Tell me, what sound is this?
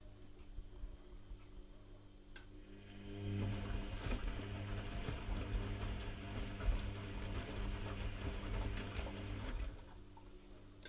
Clean sound - washing machine
machine, 10, Washing, seconds